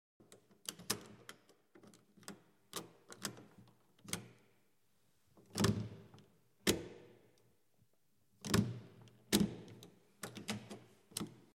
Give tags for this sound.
open; handle; door; crank

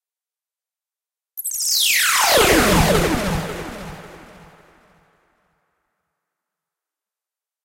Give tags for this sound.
broadcast; chord; deejay; dj; drop; dub-step; effect; electronic; fall; fx; imaging; instrument; instrumental; interlude; intro; jingle; loop; mix; music; noise; podcast; radio; radioplay; riser; send; sfx; slam; soundeffect; stereo; trailer

